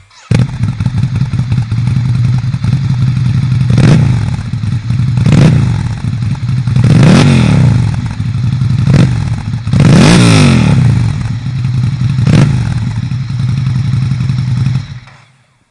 Ducati Scrambler bike exhaust
Bike exhaust sample of Ducati's scrambler bike, Recorded using a Zoom R 16 and a Blue Encore 100 dynamic mic
bike, ducati, exhaust-sound, field-recording, motorcycle, scrambler, Zoom